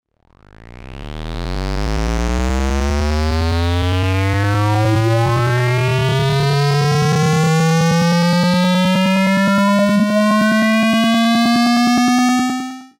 SWEEP SQUARE 13 S WAH
sweep sound created using a square waveform processed with lots of fx's.
psy, sfx, square, sweep